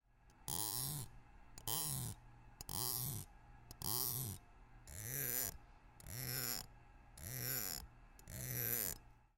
whisk handle - plastic fork
scraped the edge of a metal whisk with a plastic fork: four times in one direction, four times in the other direction.
fork, rubbing, whisk, scraped, plastic, scraping, MTC500-M002-s14